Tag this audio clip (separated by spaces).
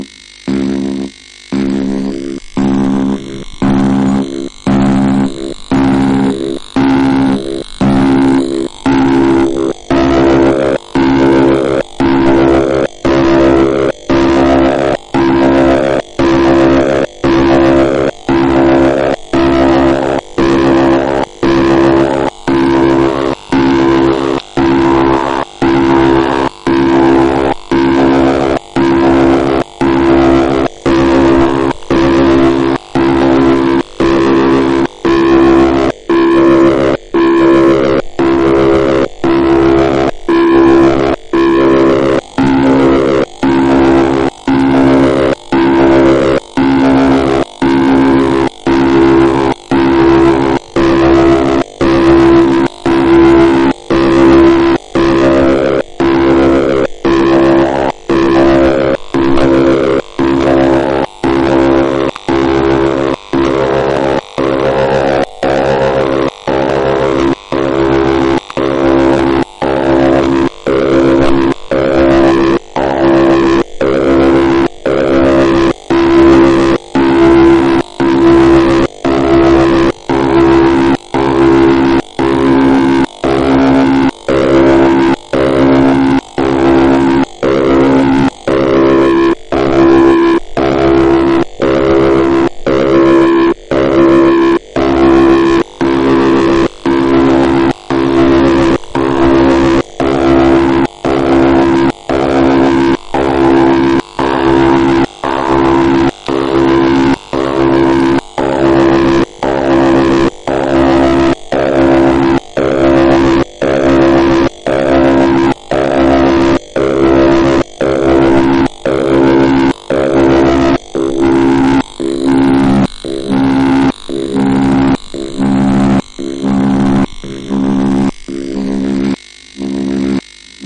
indians iroqees North-America